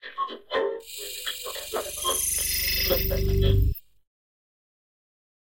radio shudders3x
shudder, radio, sound-effect, grm-tools